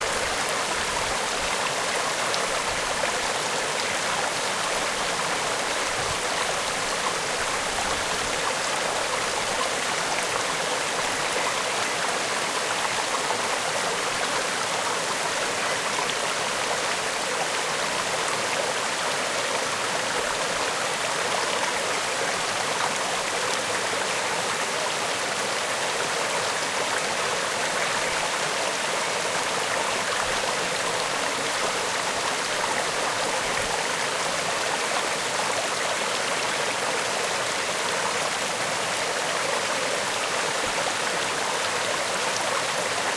Sources of a small river. Stereo recording. A Sony Handycam HDR-SR12 has been used. The sound is unprocessed and was recorder in Greece, somewhere in Peloponesse.